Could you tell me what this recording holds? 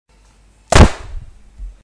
Gunshot sound made by me slamming a large book closed.
Book, Close, Slam